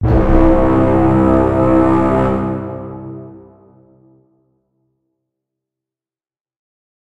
The epic and ominous orchestral "BRRRRRRRRRM" sound often found in movie trailers, such as Inception, Shutter Island and Prometheus. I've nicknamed it the 'Angry Boat'.
This is Angry Boat sound 3, which is in B-flat minor and has added distortion to give it the deepest, most foreboding sound of the bunch.
Made with Mixcraft.